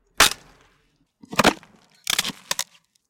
Wood Crack 2
Cracking Wood
Recorded with Zoom H4N
crack, wood, tree, cracked